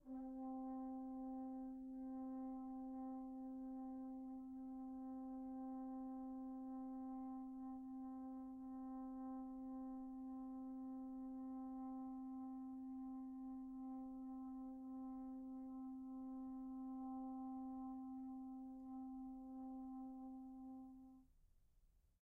One-shot from Versilian Studios Chamber Orchestra 2: Community Edition sampling project.
Instrument family: Brass
Instrument: Tenor Trombone
Articulation: sustain
Note: C4
Midi note: 60
Midi velocity (center): 20
Room type: Large Auditorium
Microphone: 2x Rode NT1-A spaced pair, mixed close mics